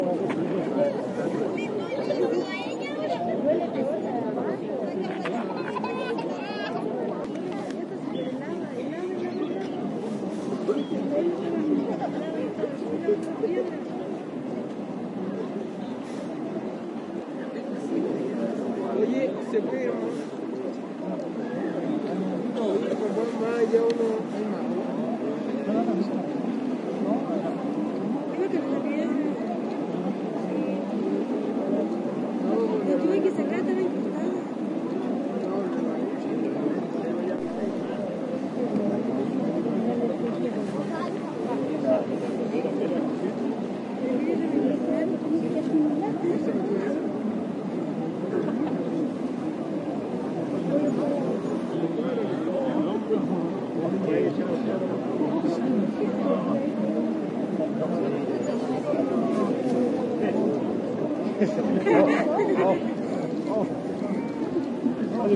ambiance at a public spa in Baños de Colina, Cajón del Maipo (Chile)